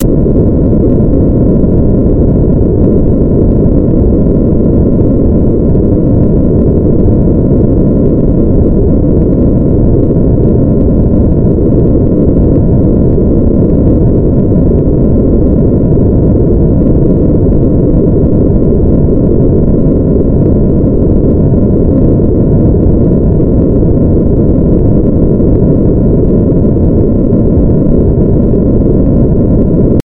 20 LFNoise2 800Hz
This kind of noise generates sinusoidally interpolated random values at a certain frequency. In this example the frequency is 800Hz.The algorithm for this noise was created two years ago by myself in C++, as an imitation of noise generators in SuperCollider 2.